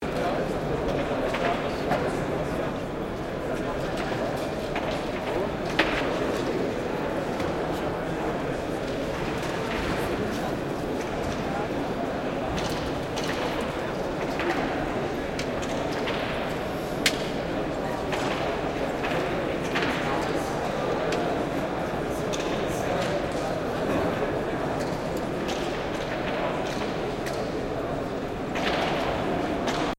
Exhibition hall, various voices, occasional construction noise, german, Messe Esse, Jugend Forscht